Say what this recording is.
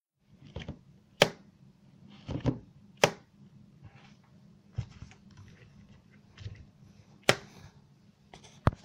multiple clicks 3
Click, minimal, short